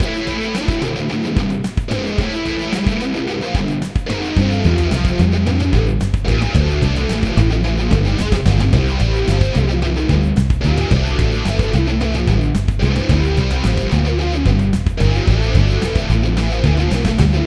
Rough rock guitar with backup drums and base.
Improvised for an iPhone project.